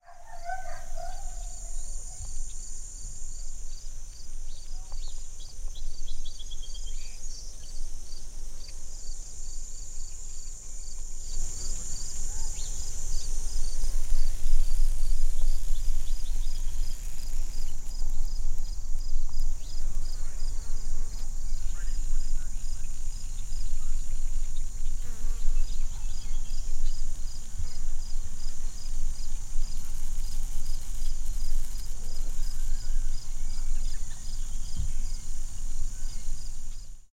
Ambience Farm 03
ambience, farm, field-recording